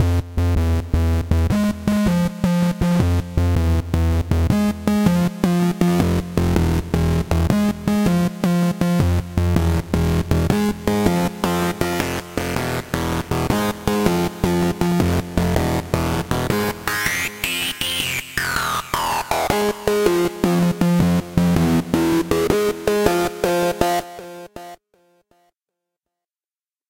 Dirty Evolver 160bpm
What a nasty, dirty little lead synth line!
Distortion, Evolving, Nasty, Resonance, TB303